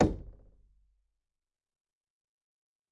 Knocking, tapping, and hitting closed wooden door. Recorded on Zoom ZH1, denoised with iZotope RX.